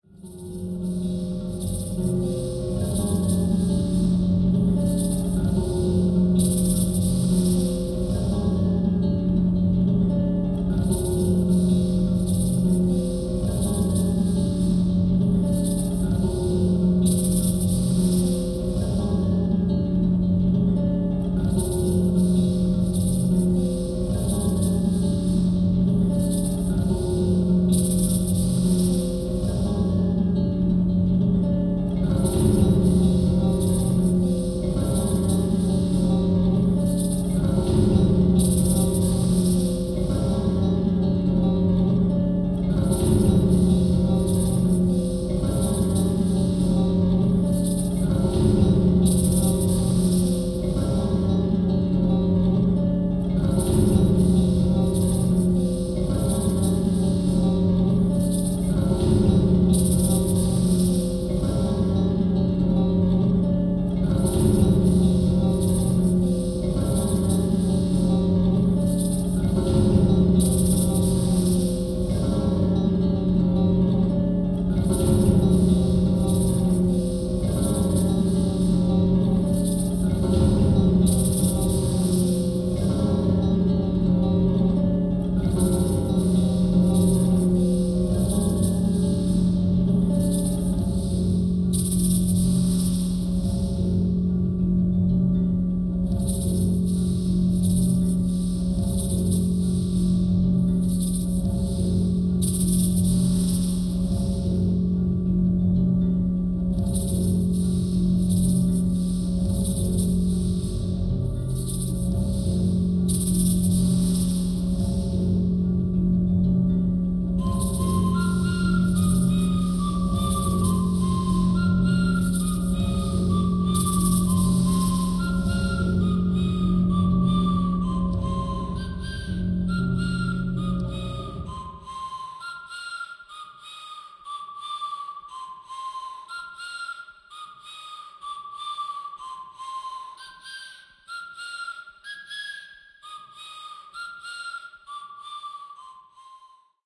playsound aw czerwińska
Piece of sound production made by my student Anna Weronika Czerwińska. She paricipates in Ethnological Workshops. Anthropology of sound that I conduct in the Department of Ethnology and Cultural Ethnology at AMU in Poznań.
anthropology-of-sound, music, Pozna, synth, ambience